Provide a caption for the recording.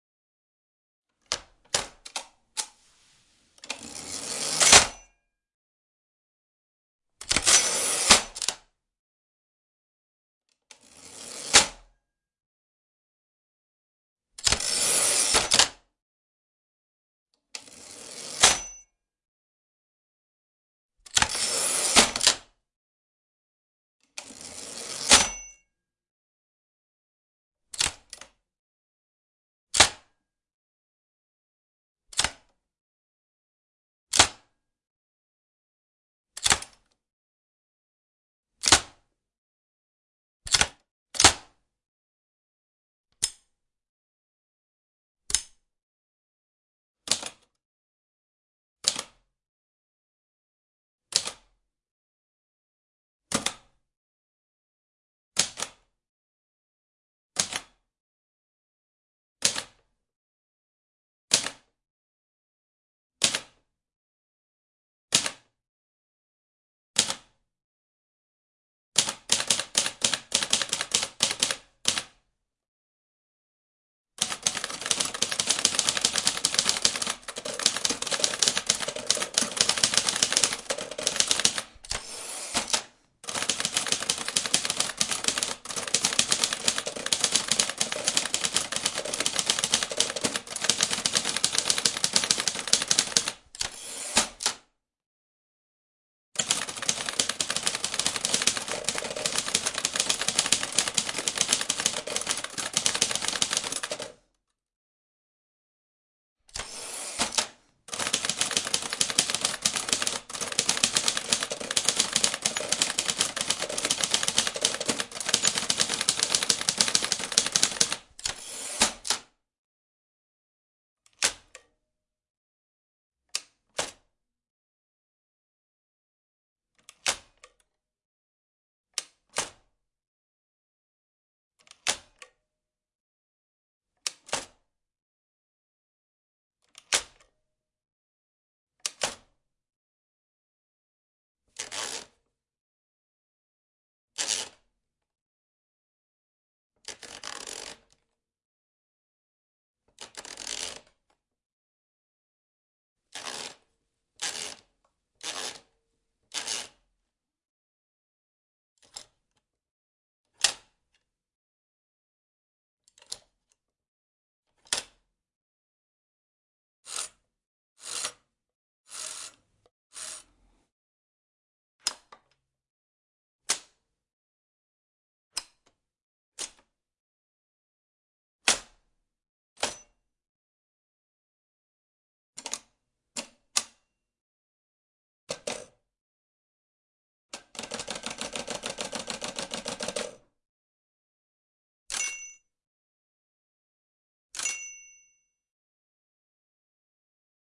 Different sounds from operating an older typewriter.
Recorded with:
Rode NT1 microphone, Sound Devices MM1 preamp, Roland R26 recorder